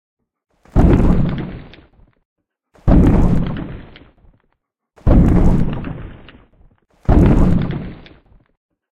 In an attempt to re-create the sound of the mighty trex stalking around in Jurassic Park, I made this. Listening to the original, I always noticed that it sounded a bit like a tree falling to the ground. That was one of the elements I used and the rest was me stomping around on various types of terrain.
foot, step, boom, monster, pound, stephen, walk, trex, crash, spielburg, jurassic-park, thump